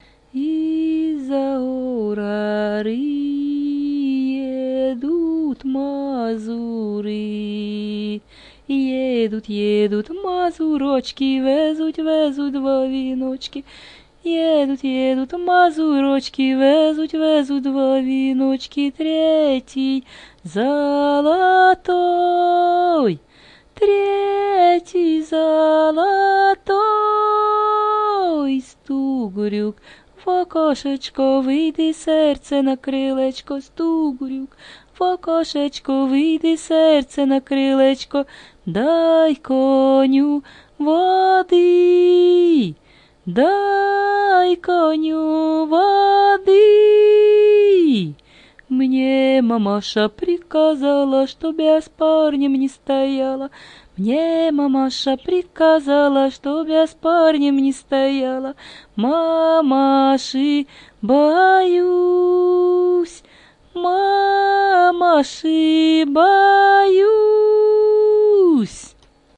Mama`s songs